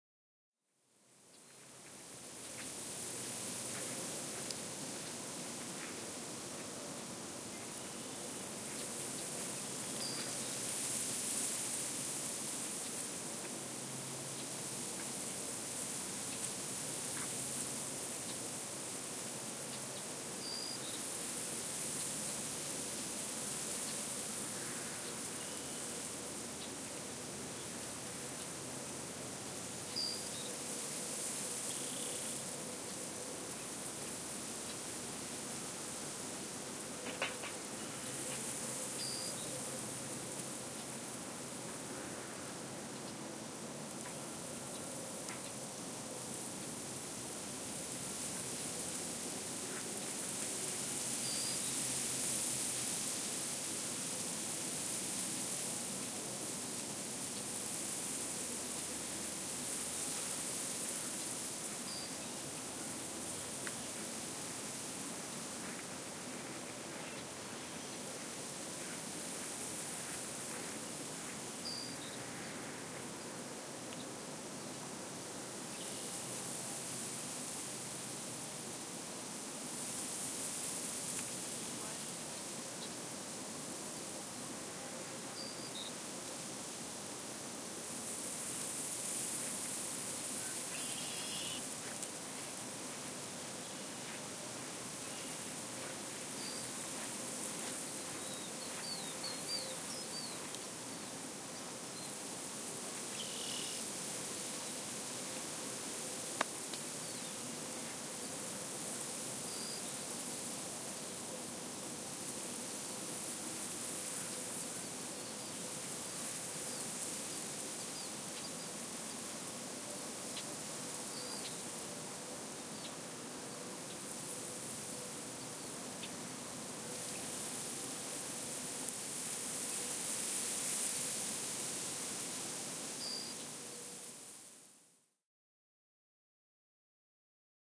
Wheat in the Wind
The sounds of a large wheat field ready for harvest in gentle winds. Audio recorder and microphone placed at base of wheat plants in a row in the middle of the field. Some birds can also be heard occasionally. Summer - Kentucky, USA
amber-waves-of-grain, ambient, birds, blow, crack, cracking, crops, farming, field, field-recording, gentle, nature, snap, snapping, wheat, wind